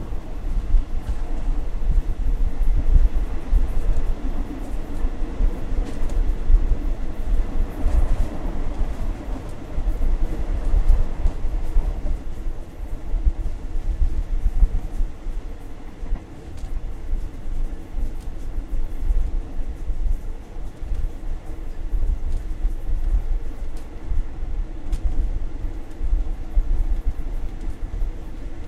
Recorded in Bangkok, Chiang Mai, KaPhangan, Thathon, Mae Salong ... with a microphone on minidisc
machines, street, temples, thailand